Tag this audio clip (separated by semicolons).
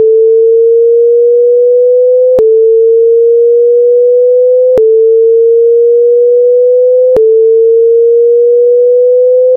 alarm; siren; wail